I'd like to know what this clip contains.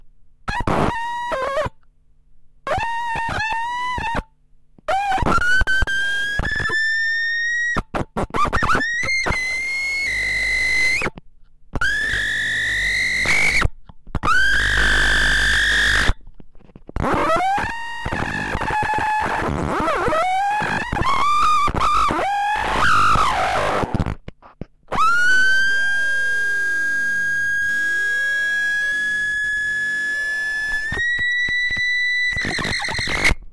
I was just goofing around on my crackle-box, connected to a contact
microphone. Contact mic went into my mixer and after that straight to
my computer. Used "Chainer" to run the signal through some vst-plugins (DFX geometer and Murder).
This part is very noisy, the little box is screaming and howling.